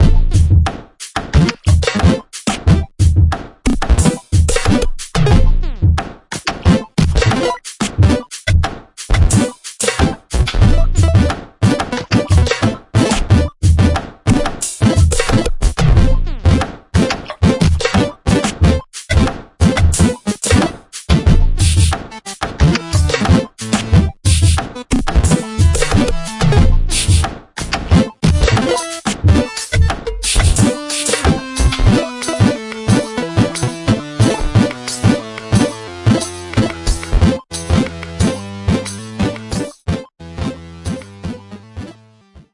16bit Teramount
a little cannon and a tube some synths, and yours truly... enjoy.